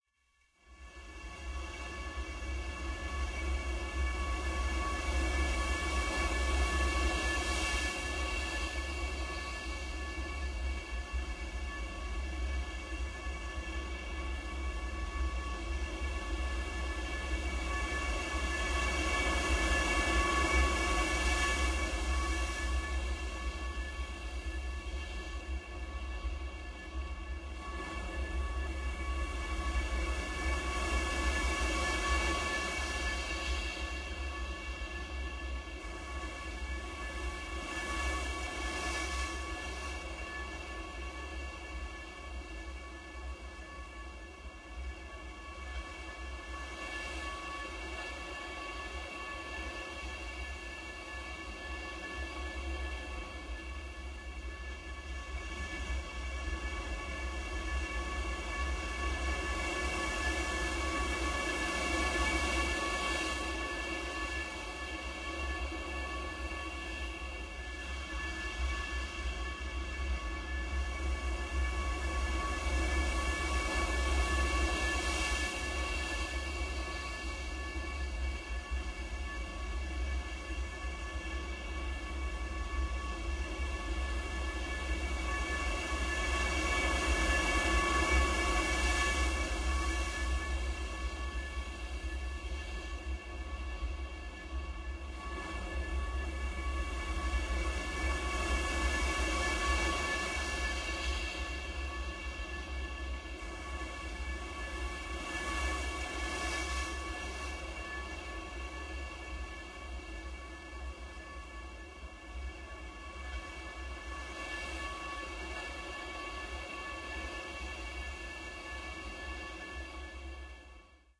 waves in vase
ocean waves re-recorded inside a vase using miniature omni's.
HR824-> EM172-> TC SK48.